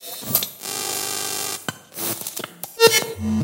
Computer Gibberish 1
This sound or sounds was created through the help of VST's, time shifting, parametric EQ, cutting, sampling, layering and many other methods of sound manipulation.
๐Ÿ…ต๐Ÿ† ๐Ÿ…ด๐Ÿ…ด๐Ÿ†‚๐Ÿ…พ๐Ÿ†„๐Ÿ…ฝ๐Ÿ…ณ.๐Ÿ…พ๐Ÿ† ๐Ÿ…ถ
electronic, lo-fi, sound-design, synth, glitch, electric, future, digital, noise, strange